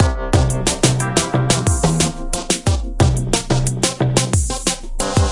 country song007
hip-hop, loop